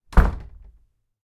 Raw audio of a wooden door being closed with a little force. Recorded simultaneously with the Zoom H1, Zoom H4n Pro and Zoom H6 (Mid-Side Capsule) to compare the quality.
An example of how you might credit is by putting this in the description/credits:
The sound was recorded using a "H1 Zoom recorder" on 17th November 2017.